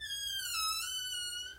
Metal door squeaking sound effect I made for a video game I developed.